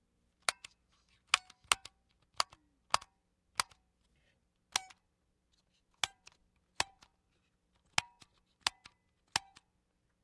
Buttons from the faceplate of an old Tektronix waveform / oscilloscope. Sennheiser ME66 to M Audio Delta
button, dial